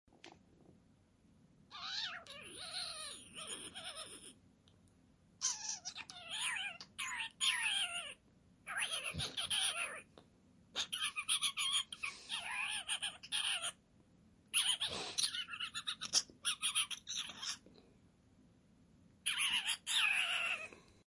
The sound that a rat makes, as it scurries past.